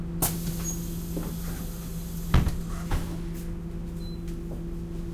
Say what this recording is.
this noise of a bus door opening made me think of building a pack of the sounds I imagine you could (possibly) hear inside a spaceship
bus, city, machine, mechanical, spaceship